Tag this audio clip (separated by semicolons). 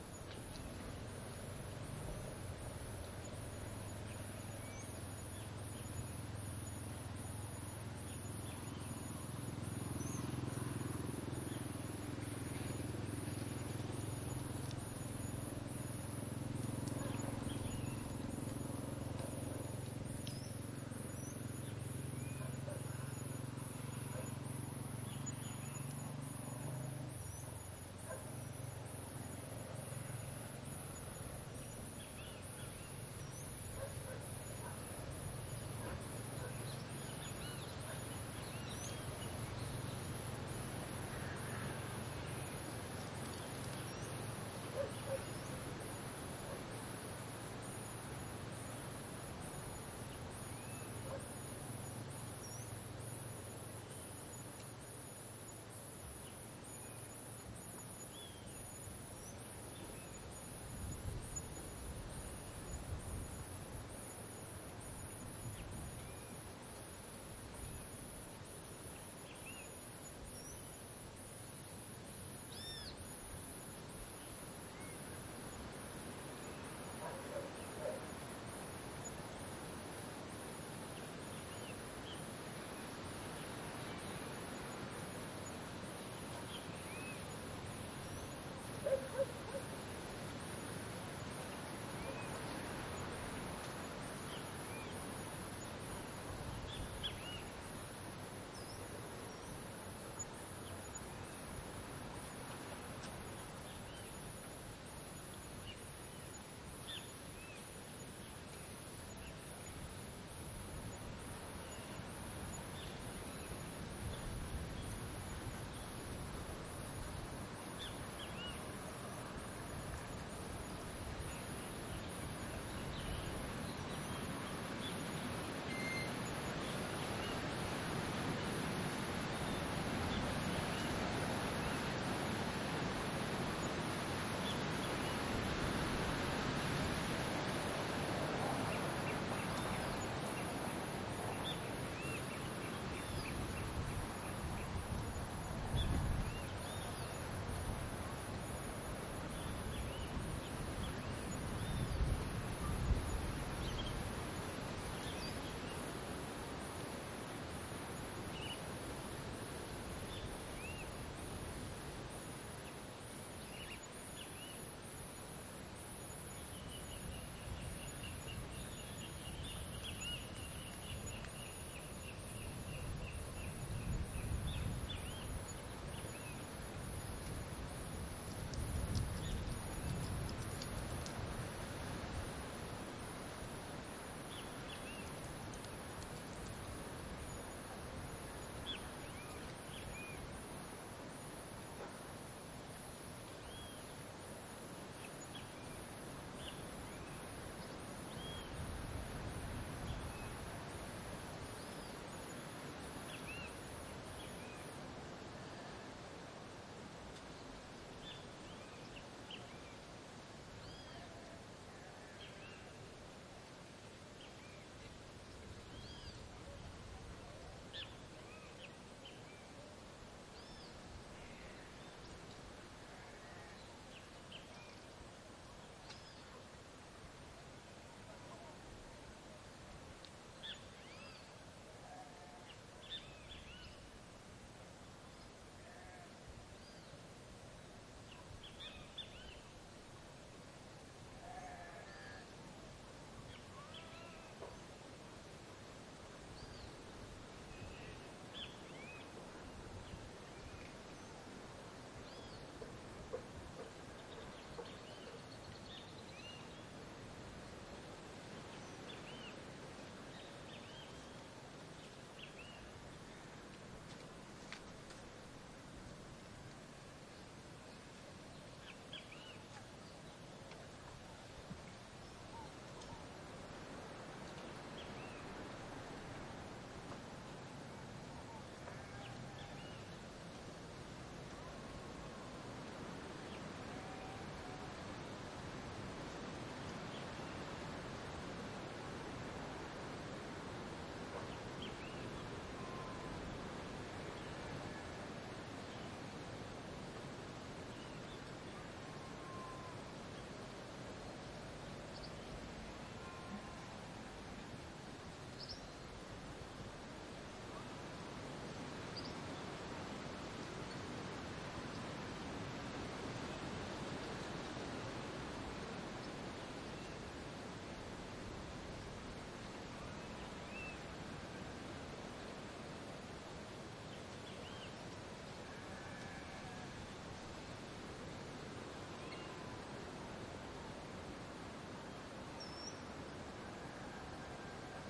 ambiance; birds; field-recording; nature